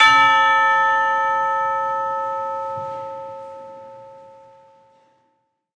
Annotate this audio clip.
In this case we have managed to minimize audience spill. The mic was a Josephson e22 through a Millennia Media HV-3D preamp whilst the ambient partials were captured with two Josephson C617s through an NPNG preamp. Recorded to an Alesis HD24 then downloaded into Pro Tools. Final edit and processing in Cool Edit Pro.
ringing, hanging, church, live, third, arts, josephson, media, alesis, percussion